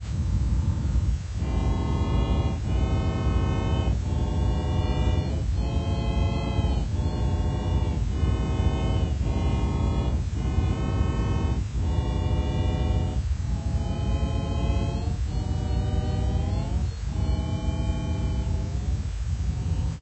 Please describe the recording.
Spectrograph of violin audio mangled to produce a space violin.